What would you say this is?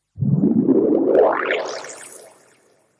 HITS & DRONES 12
broadcasting, Fx, Sound